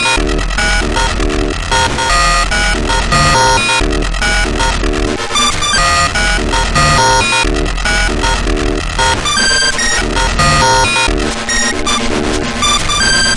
circuitbent Casio CTK-550 loop2
loop, circuit, casio, ctk-550, bent, sample